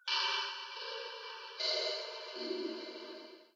Some taps with effects. Personally, I think it sounds underwater-cavernish.
cavern, eerie, taps, pipes